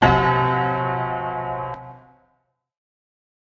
Dissonant Piano Stinger
Ugly piano chord, jarring stinger.
Recordist Peter Brucker / recorded 4/19/2018 / sm 58 microphone / old out of tune piano in a basement
chord, dissonant, piano, stinger, sudden